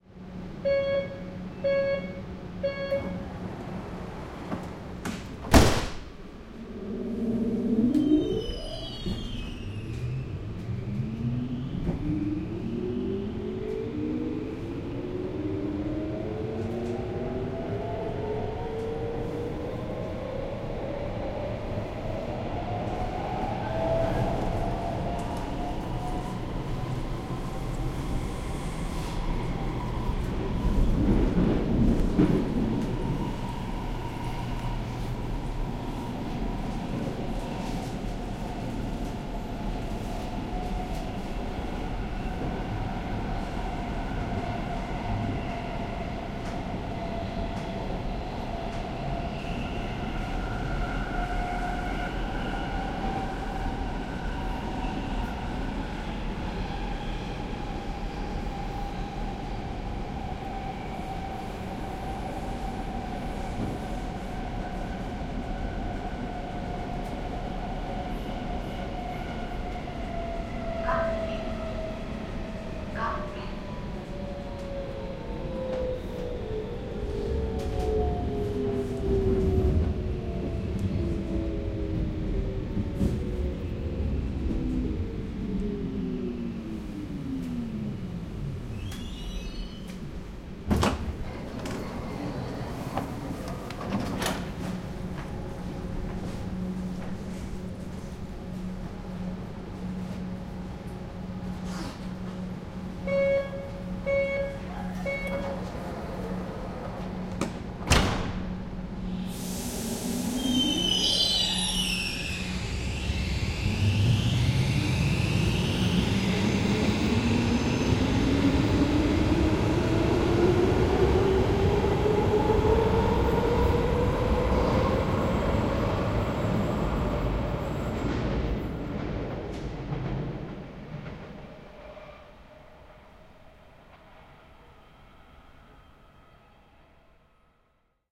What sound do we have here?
Vaunussa äänimerkki, signaali, 3 x piip, ovet kiinni, lähtö, ajoa, kulutus, tulo asemalle, ulos vaunusta, ovet, äänimerkki, juna lähtee ja etääntyy.
Äänitetty / Rec: Zoom H2, internal mic
Paikka/Place: Suomi / Finland / Helsinki
Aika/Date: 04.03.2014